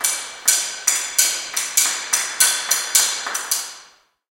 Metallic Pipe Hits on Concrete in Basement
Hitting a metallic pipe on a concrete floor in a big room. Recorded in stereo with Zoom H4 and Rode NT4.